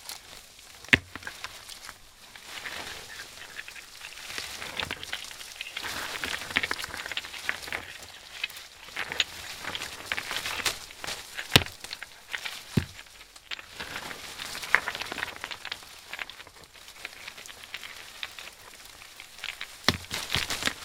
rocks rolling and leaf rustle
Foley SFX produced by my me and the other members of my foley class for the jungle car chase segment of the fourth Indiana Jones film.
leaf, rocks